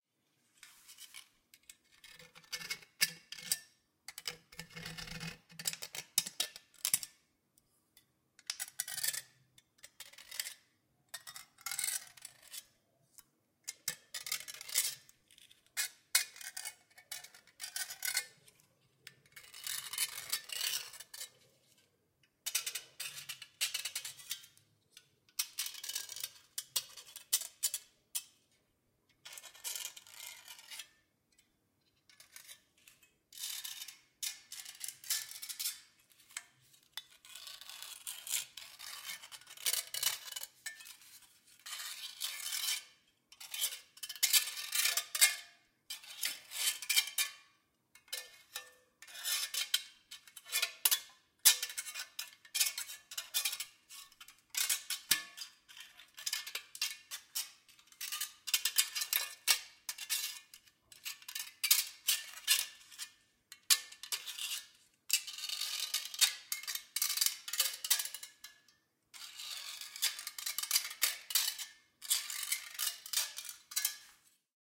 Scraping a Soda Can
Metallic noises of two torn pieces of aluminum scraping against each other. The pieces were from a can of soda, and torn by hand on purpose to have more jagged and unclean edges. Variations available.